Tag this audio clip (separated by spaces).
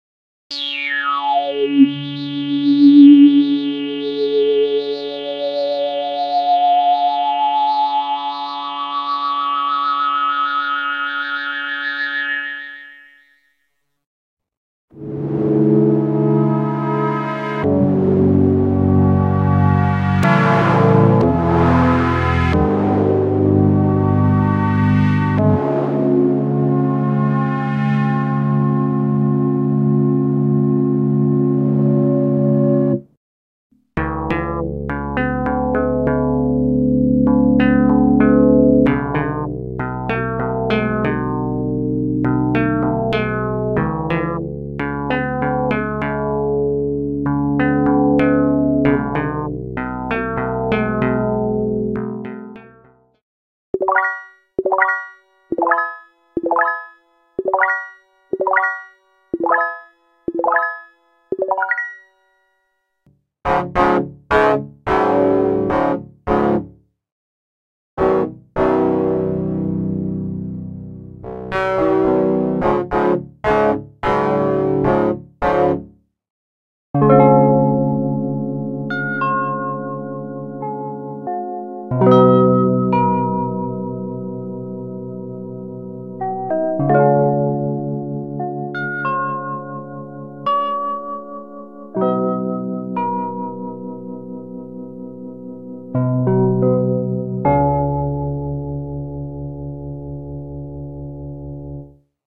Demo Synth Reaktor CoopSyn